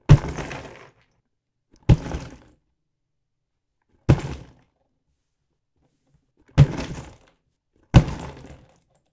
box-dropping-with-stones
Dropping a small, closed cardboard box with stones and shells inside it onto a wooden floor.
Recorded with yeti USB condenser.
case, chest, crate, impact, land, thud, wood, wooden